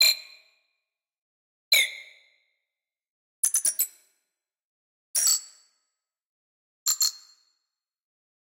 Beep sequence sci fi interface
| - Description - |
Beep sequence
| - Made with - |
Sytrus - Fl Studio.
For projects or whatever I wanted.
computer, data, interface, scifi